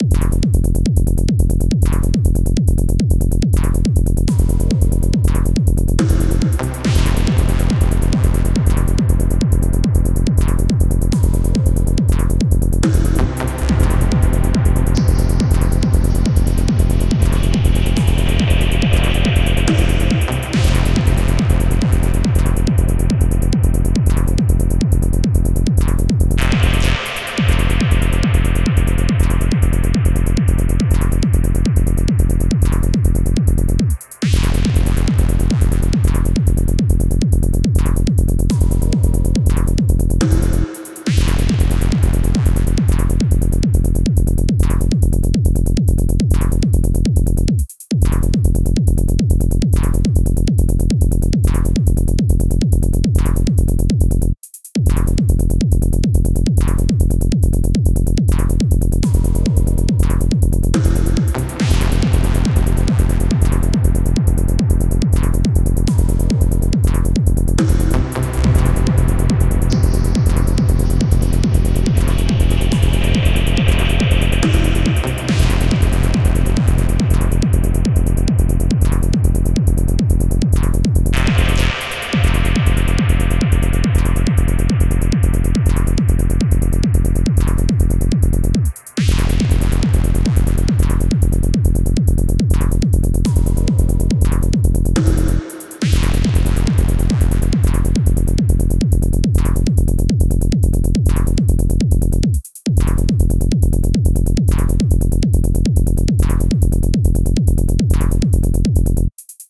PSYTRANCE loop by kris klavenes
club, PSYTRANCE, trance